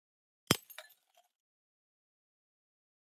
Breaking Glass 04
breaking, glass, break, shatter, smash, shards